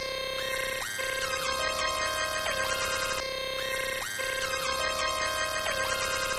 Taken from an improve session on my old Jx-8p synthesizer that was sampled straight into my k2000. This one is higher notes.
loop, instrumental, analog, instrument, synth